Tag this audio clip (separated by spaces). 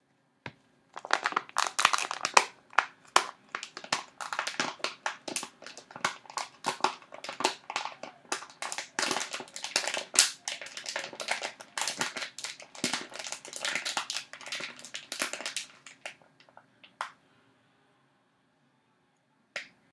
crunch crunching cup plastic